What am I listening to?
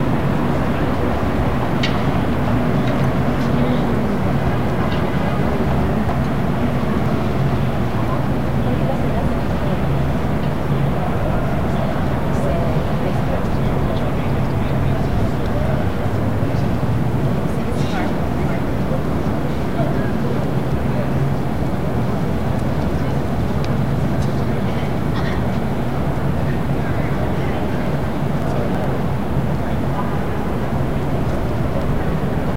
Jun Kaneko Dango 04 Android
Urban ambient captured as an attempted contact recording of one of Jun Kaneko’s ceramic Dango sculptures (4 of 4) in San Jose, CA, USA. Sampled on February 12, 2011 using a Sony Ericsson Xperia X10 wired piezo microphone, adhered with putty.
ambient, Android, ceramic, contact, contact-mic, contact-microphone, Dango, field-recording, improvised-mic, Jun-Kaneko, microphone, Sony-Experia-X10, Tape-Machine, urban, wikiGong